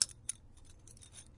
Keys being shaken and scraped together.